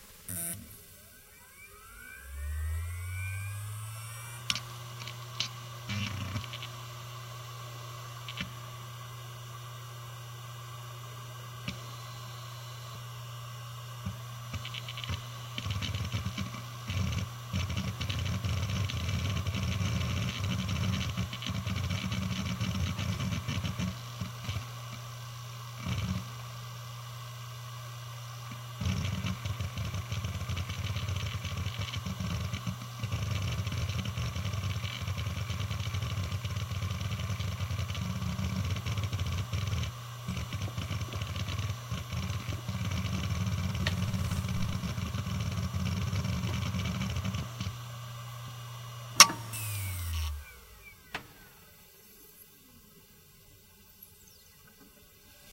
WD JD - 7200rpm - FDB
A Western Digital hard drive manufactured in 2005 close up; spin up, writing, spin down. (wd1600jd)
disk, drive, hard, hdd, machine, motor, rattle